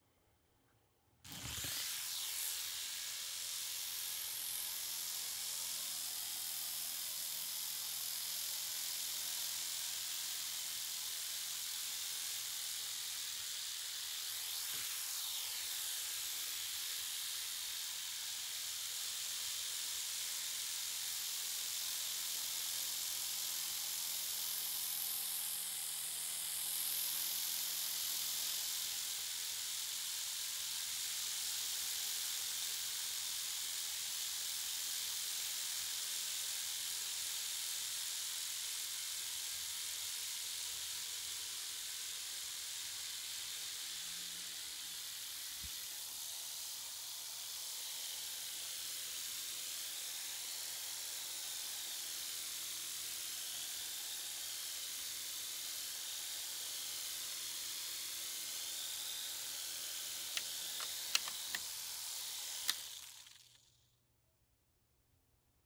MISC Int Toy Helicopter 001
My wife bought me this cool remote control helicopter for my birthday, so I recorded it flying around the room. At the very end, the blades knick the wall a couple times before it falls to the floor and stops.
Recorded with: Sanken CS-1e, Fostex FR2Le
rc, blade, toy, fan, motor, helicopter, remote-control, rotor